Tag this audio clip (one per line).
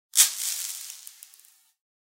scatter
gravel
agaxly
litter